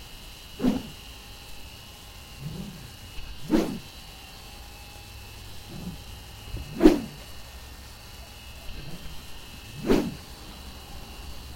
Swooshing noises made by swinging a long wooden dowel. Recorded on a crappy Dell Inspiron 8000 internal mic. Mono, unprocessed.